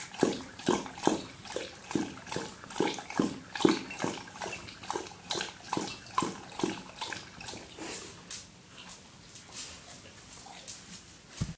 Dog Drink 1
A dog (a male black Labrador Retriever) laps some water.
water, dog, drinking, lap, drink